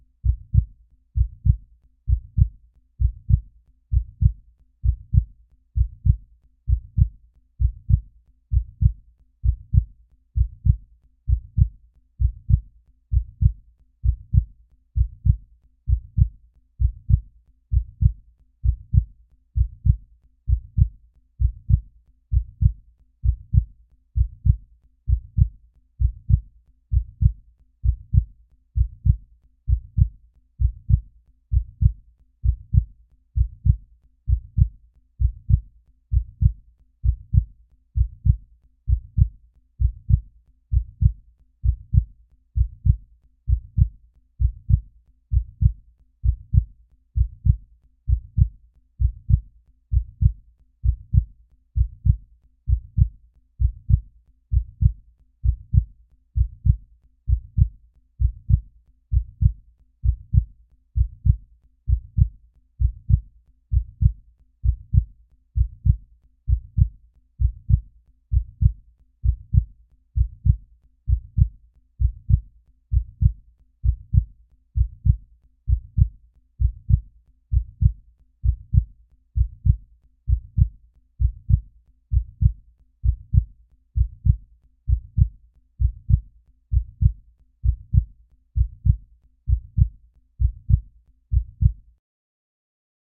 Human heartbeat, almost 70 beats per minute.